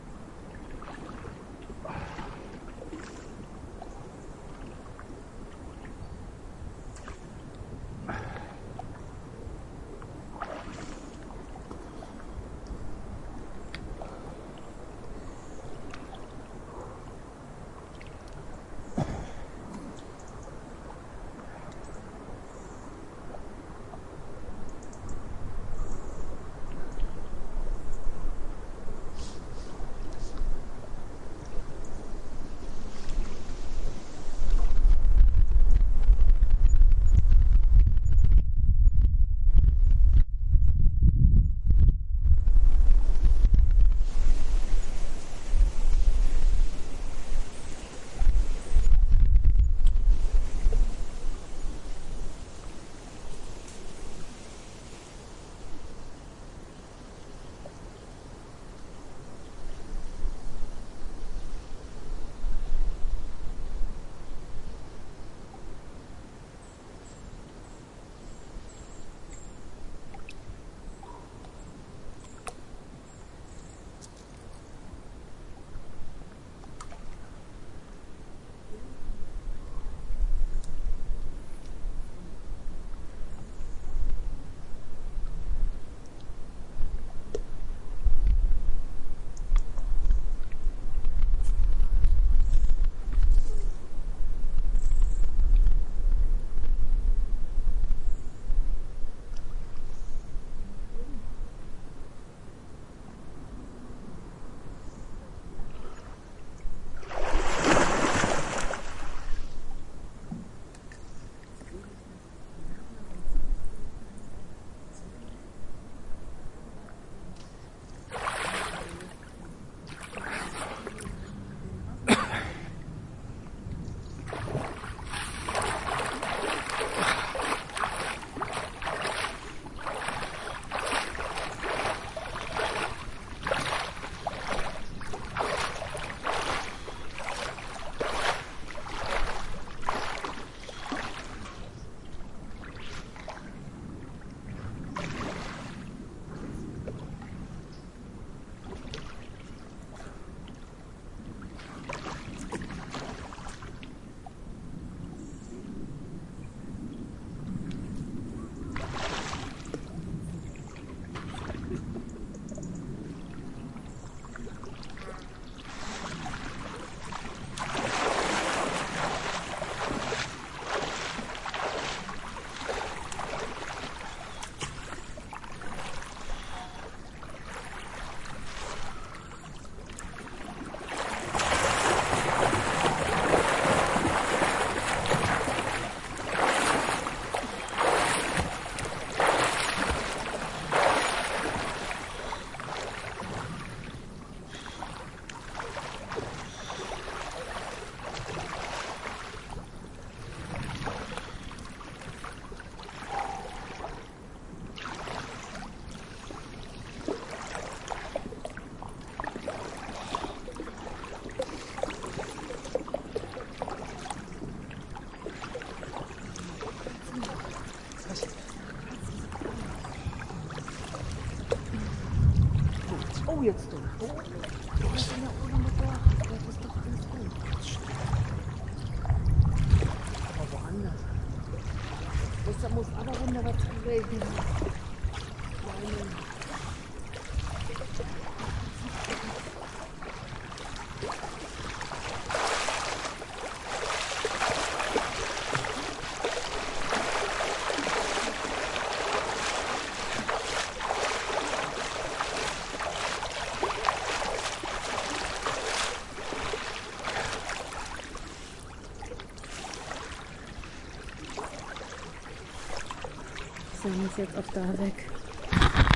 a swimmer in a natural pool made of stones and filled with fresh spring water in east-german woods.